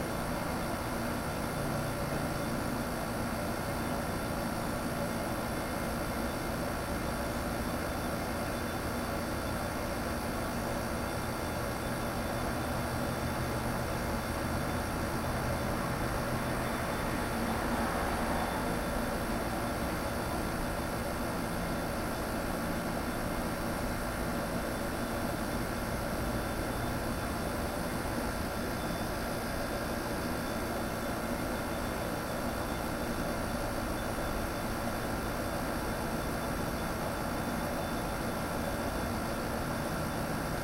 AC unit
Recording of A hotel air conditioner. Recorded with an H4nPro.
AC, Air-conditioner, Fan, unit